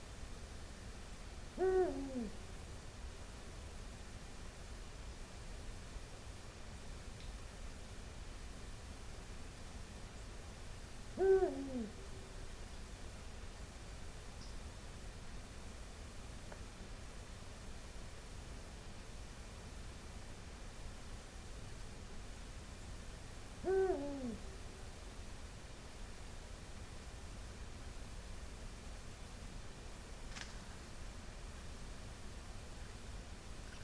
eagle owl1
Another recording made from our bathroom window which faces a stand of Eucalyptus trees. This time late at night when all was still. The haunting sound of an Eagle Owl in one of the trees. Listen carefully towards the end of the sample and you will hear its wing catch some leaves as it takes off. Recorded on a Panasonic Mini DV Camcorder with a cheap electret condenser microphone.
owl, calls, bird